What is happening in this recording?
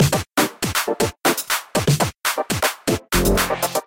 Music Loop to be played as background, trying to be funky.
loop, groovy, music, percussion-loop